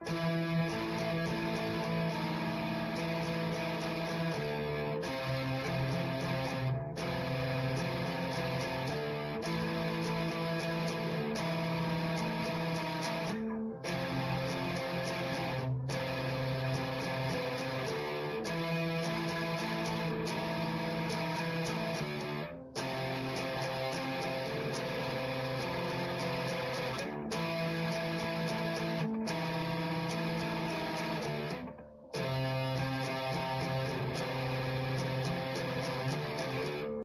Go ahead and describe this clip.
Slow Creepy Rock Louder Version
Slow creepy rock I created original with Gibson Les Paul Electric guitar. I made for games or videos can be looped.
electric-guitar, game, guitar-music, music, original, streaming, video